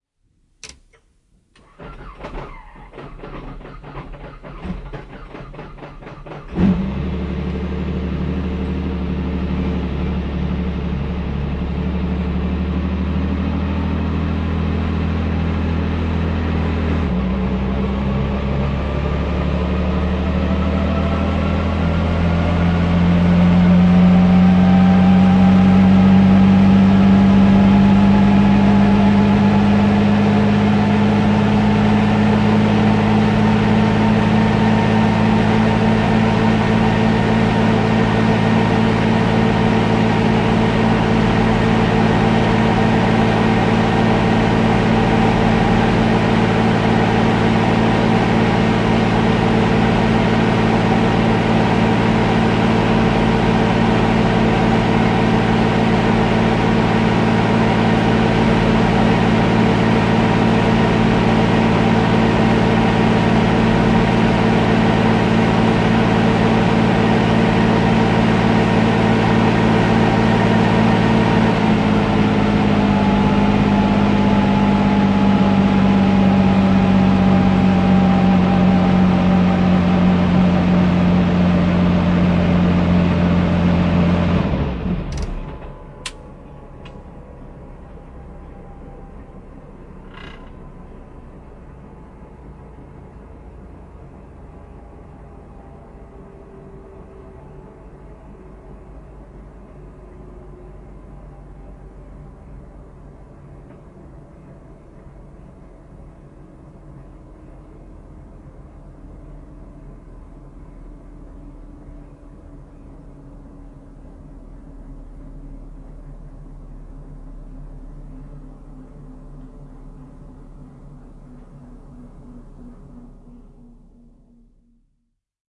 Helikopteri, tyhjäkäynti / Helicopter, start, idling, engine shut down, rotor blade stays spinning long, interior
Bell 47G2 OH-MIG. Käynnistys, tyhjäkäyntiä, moottori sammuu, roottorin lapa jää pyörimään. Sisä.
Paikka/Place: Suomi / Finland
Aika/Date: 19720323
Field-Recording, Finland, Finnish-Broadcasting-Company, Helicopter, Helikopteri, Idling, Interior, Soundfx, Suomi, Tehosteet, Yle, Yleisradio